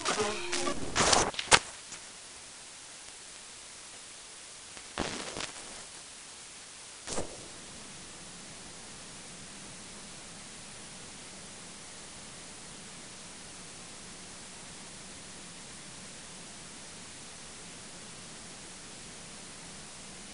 sample exwe 0202 cv fm lstm 256 3L 03 lm lstm epoch5.56 1.6729 tr
generated by char-rnn (original karpathy), random samples during all training phases for datasets drinksonus, exwe, arglaaa